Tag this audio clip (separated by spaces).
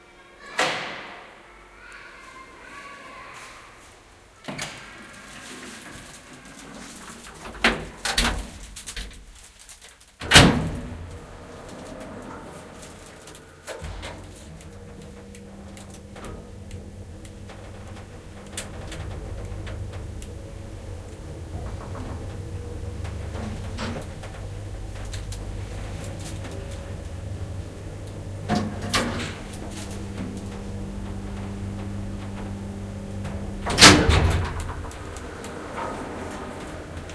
binaural elevator field-recording machine